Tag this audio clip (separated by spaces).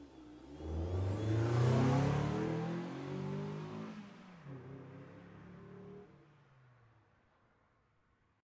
Acceleration Car Cars Drive-by Engine Exhaust Fast Loud